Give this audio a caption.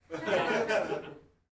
Recorded inside with a group of about 15 people.
live, laughing, chuckle, theatre, haha, laughter